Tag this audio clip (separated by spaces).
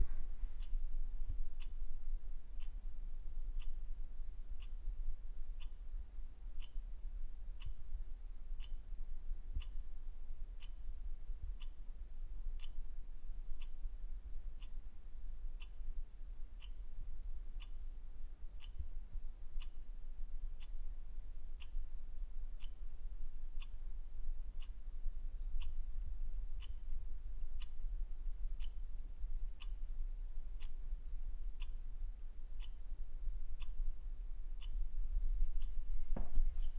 tick clockwork kitchen Clock watch time ticking mechanism small